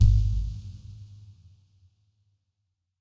amber bass 02, long
i worked out these 3 variations of the same bass sample, all very deep low frequency. should be suitable for minimal techno or ambient, and it is useless for small amps, because sound is of very low freq.
bass-ambient, clean-bass, low-bass, nice-bass, sub-bass